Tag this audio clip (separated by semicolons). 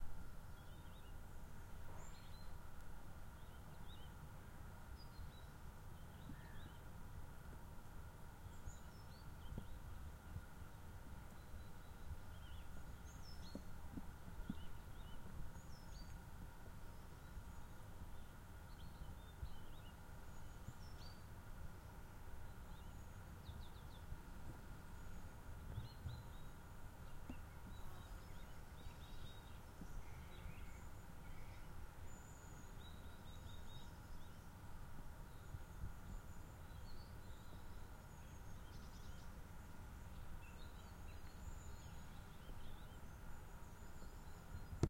Free; spring; birds; forest; field-recording; nature; Ambiance